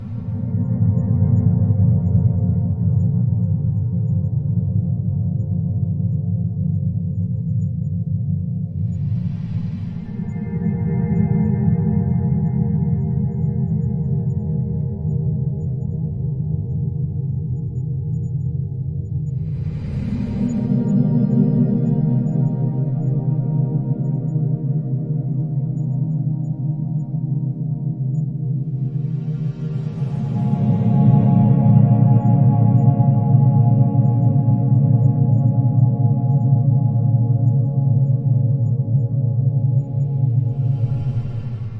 somewhere under the sea
guitar armonics with fade in effects. The whole track has been slowed down.
the mix has been created with the free software "Audacity" and recorded with a simple microphone.